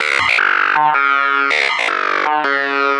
Glitch Bass 001
bass, dance, electronic, glitch, loop, synth, techno, trance